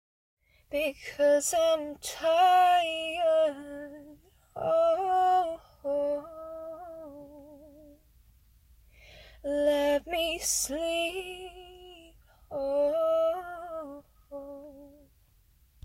'Because I'm tired, let me sleep'
A female voice singing a line that could be used in a song. :) (Sorry for lack of detail, I'm pretty busy nowadays)
lyrics, singing, voice, female, girl, song, vocal